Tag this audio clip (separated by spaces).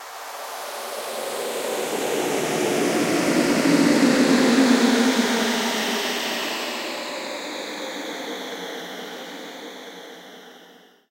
drama terrifying phantom ghost panic haunted slender anxiety